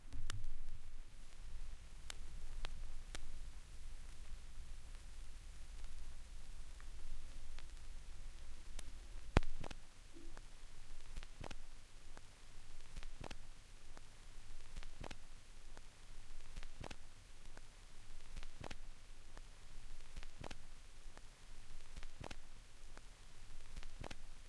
33 record end
The noise at the end of a 33 1/3 record.
analog, click, loop, needle, noisy, pop, record, vinyl